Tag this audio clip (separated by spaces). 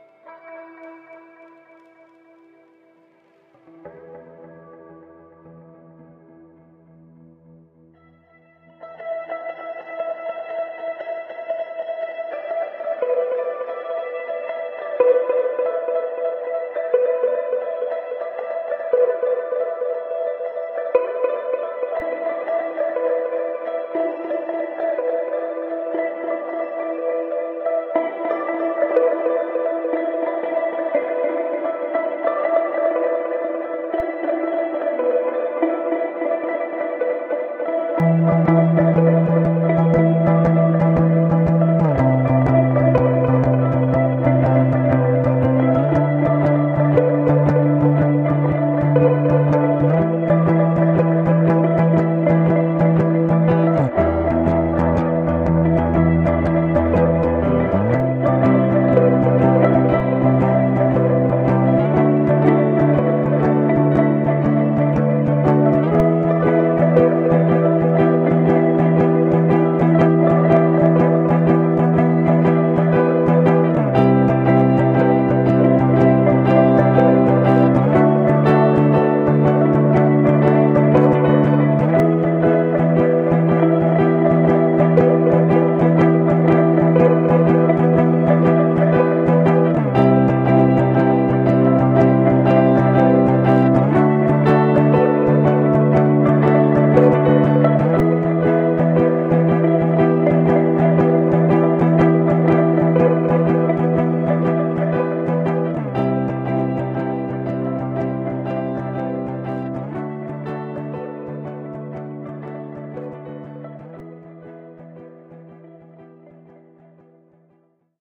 background-sound guitar-effects thematic